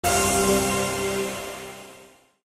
Magical Hit
Intense sudden light, its has positive magic vibes ...is the sound you would put when u get a correct answer in a contest or something like that...it also feel like when u get an idea. its base are metalic and glass-breaking sound effects, put them in Reaper and playin with a lot of mEQ's and synth filters
correct, energy, light, magic, magical, positive, powerful, spell